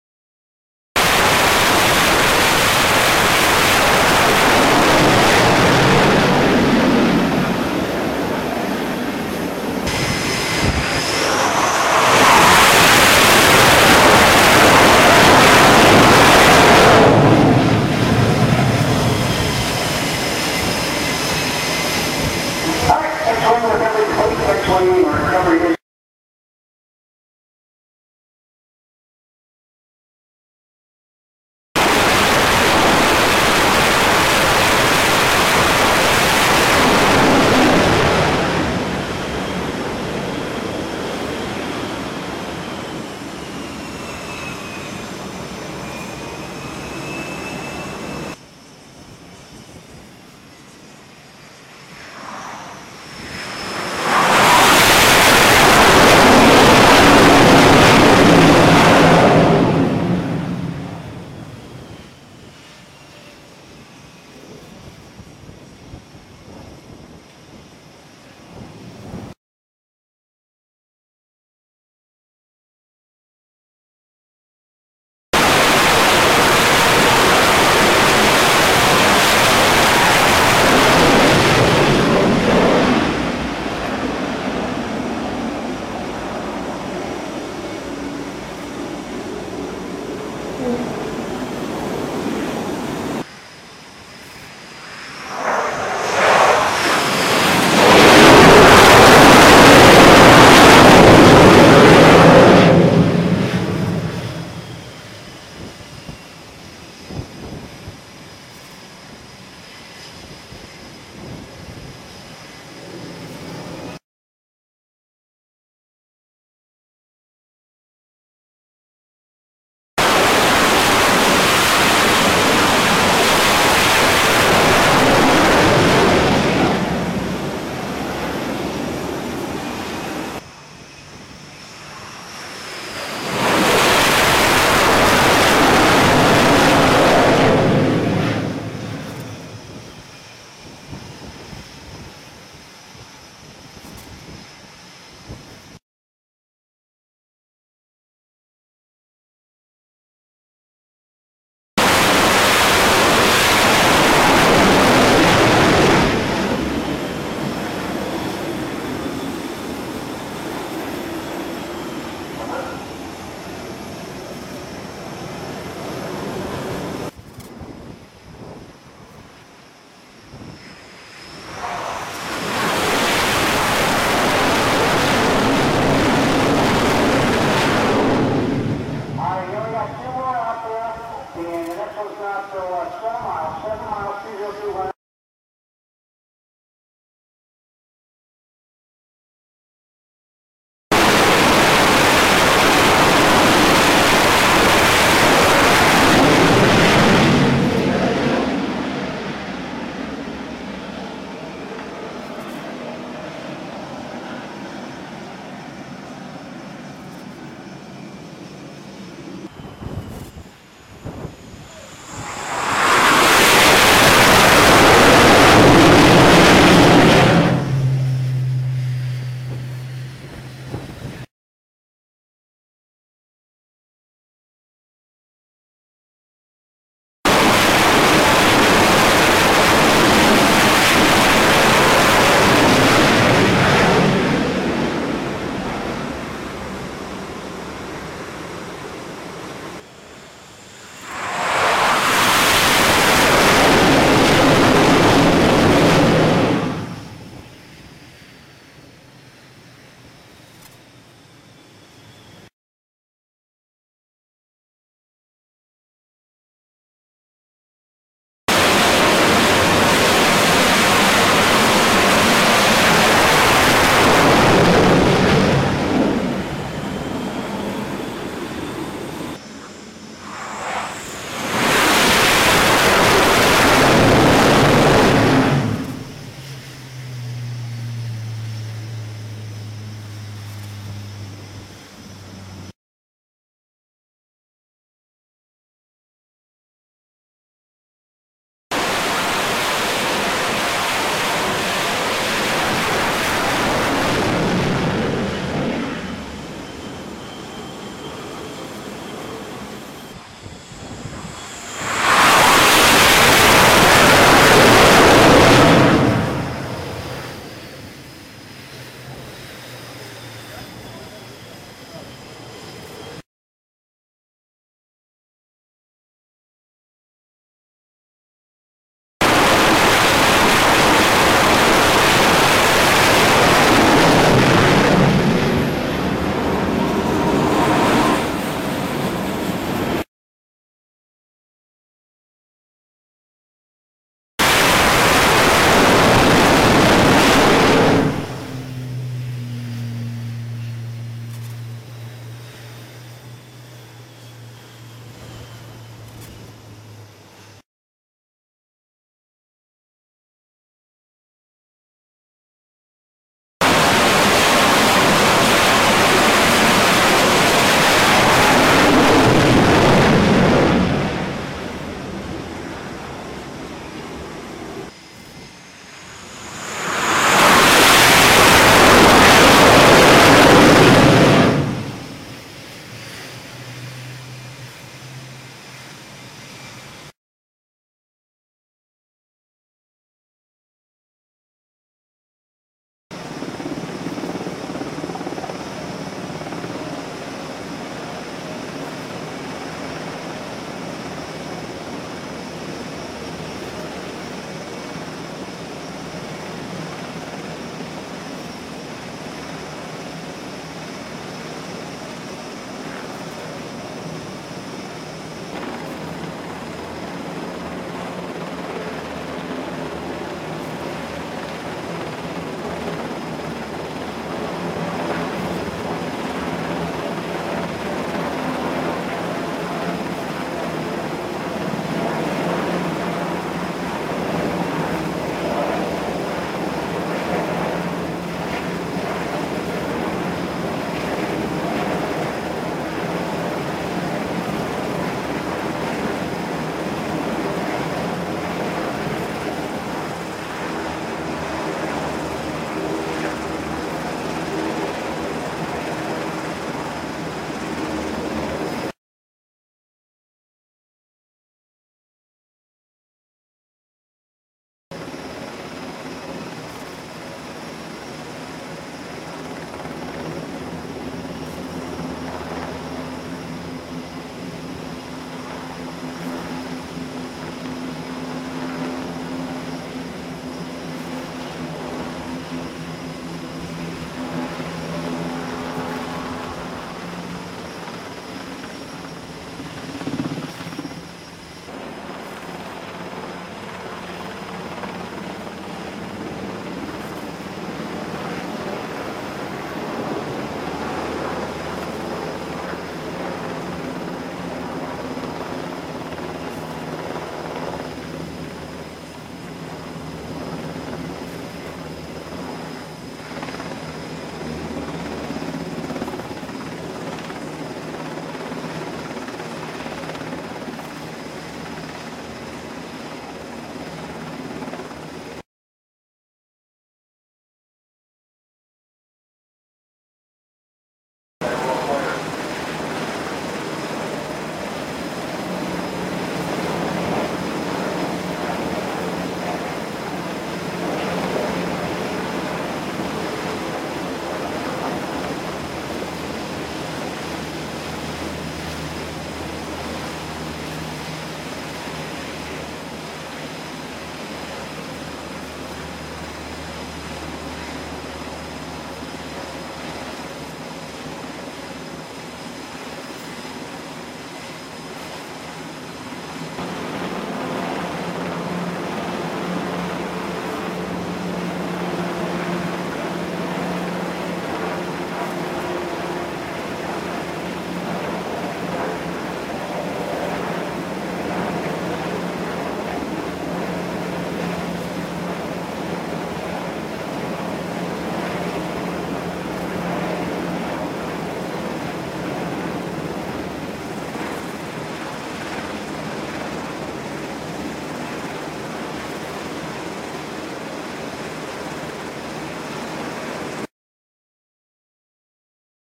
Jet Fighters and Helicopter Launches and Recoveries (Landings) on Aircraft Carrier
Source video description: Various launches and recoveries of aircraft aboard the USS George H. W. Bush (CVN 77)
air, aircraft, airplane, aviation, engine, helicopter, jet, landing, launch, loop, looping, motor, noise, plane, propeller, propulsion, recovery, speed, start